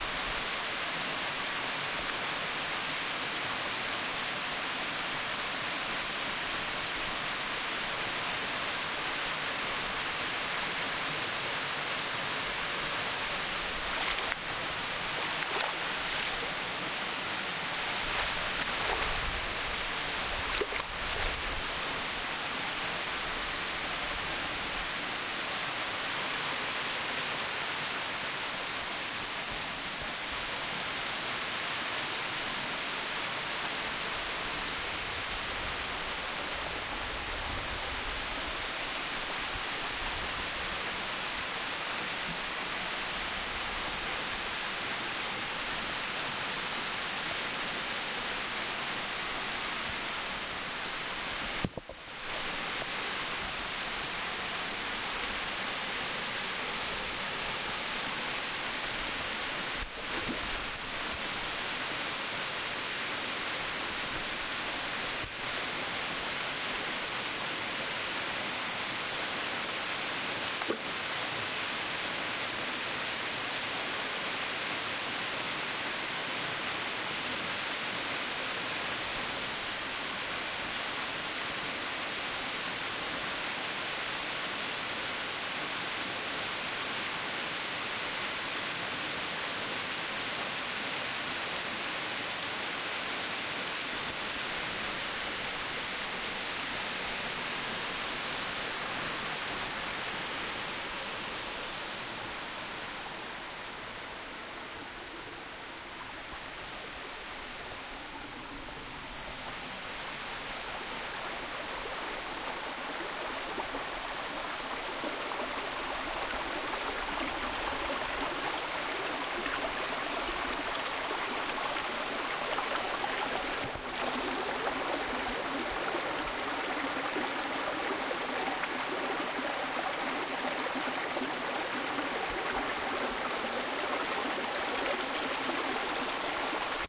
Content warning
A recording of a hidden waterfall amidst forests and rocks in the Scottish Borders, Scotland. On the recording you can hear a waterfall, the sound of splashing water. The recording made on the Zoom h5 handy recorder, the headphones used are Superlux, SanDisk 32 GB card. Regards. Bart.